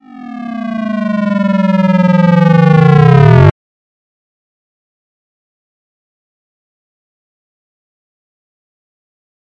synthesized sound of "dropping" tone with shorter decay
dropping, sound, synth, synthesis